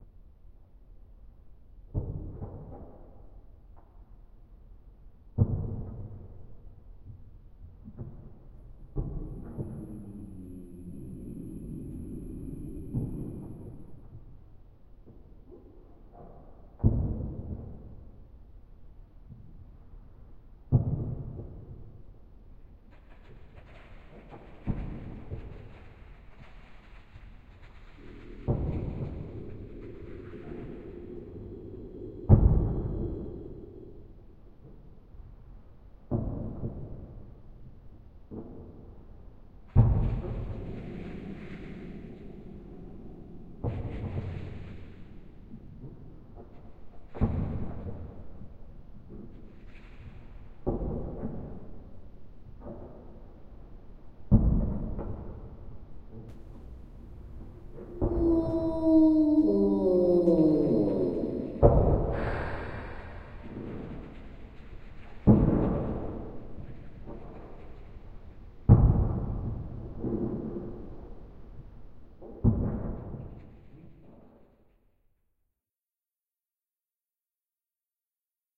bm Monster
Sound of monster approaching from the distance. Created with sounds of doors, crunching chips, breaking glass, and footsteps.